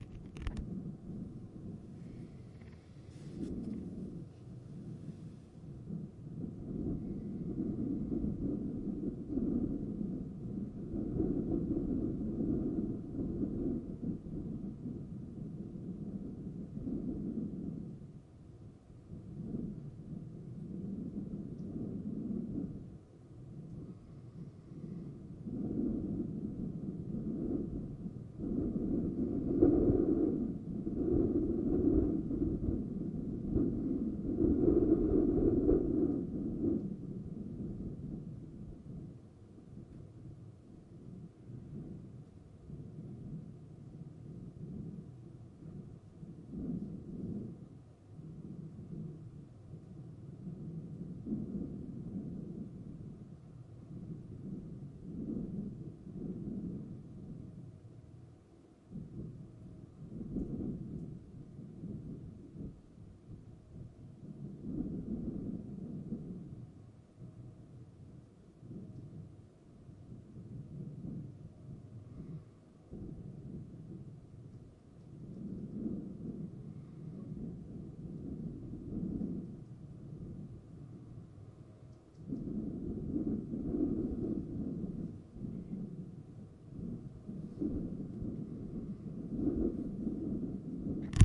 Wind blowing in a chimney
errie, field-recording, wind-blowing